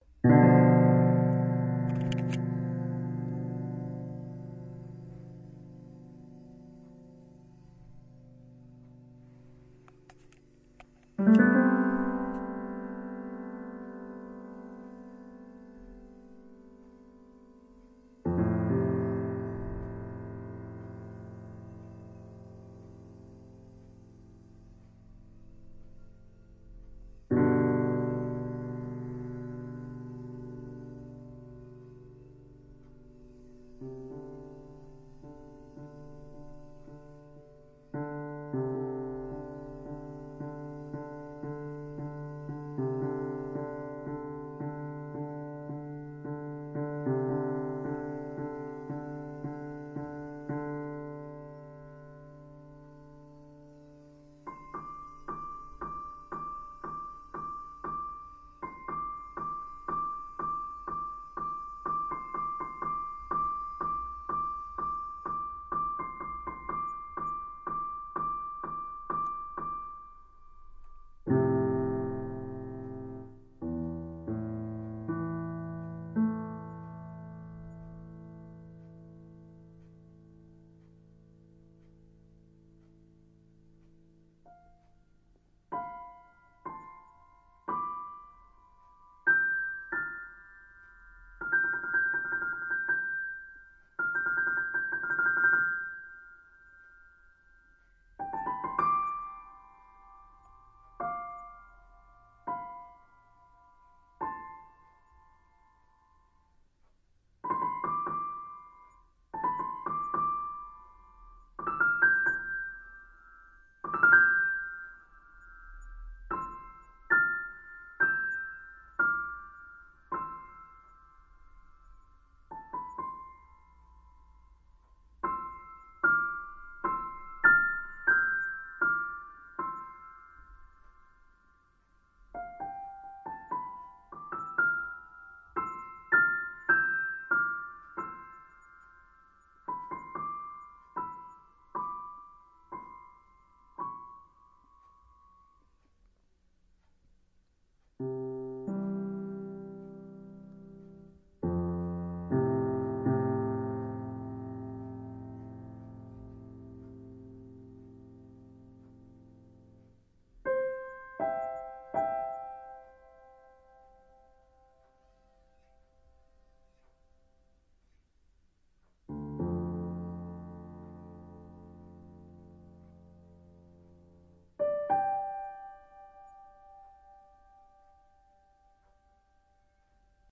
Mix of different piano sounds
piano, random